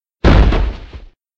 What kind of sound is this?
This is a little cartoon thud.